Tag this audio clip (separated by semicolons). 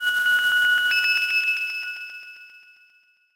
sound; soft; sfx; emotion; alien; signal; ringing; pattern; future; chiptone; gentle; tune; noise; effect; digital; space